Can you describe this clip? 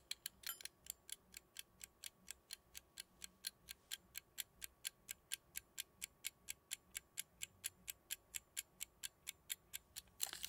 kitchen timer ticking
Ticking of the kitchen timer recorded in a studio
mic: Oktava MK 319
pre: Warm Audio TB 12
audio interface: SPL Crimson
antique, clockwork, domestic, kitchen, mechanism, old, raw, tic, ticking, ticks, time, timer, wall-clock